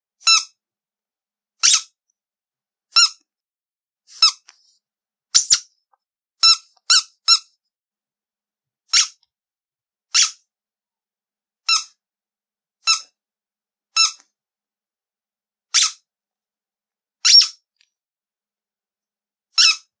This squeaking sound I made using a squeaky fish toy.
comic, squeak, cartoon, cartoon-sound